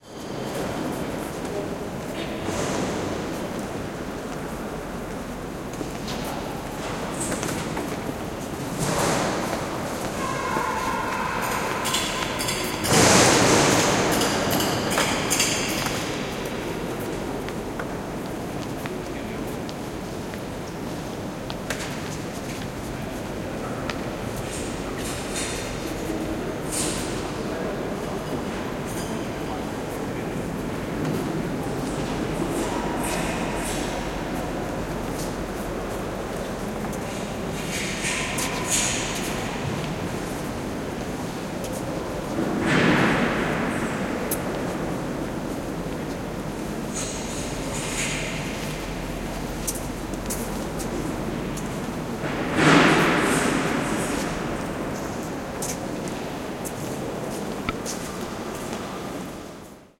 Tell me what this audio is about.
recorded with a olympus LS-11 in the cathedral of cologne